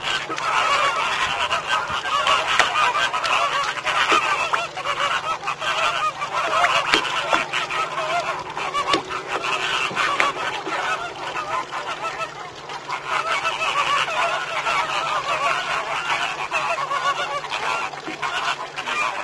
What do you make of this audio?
Phoenicopterus ruber, flamingos birds in Ría Lagartos, Yucatán, México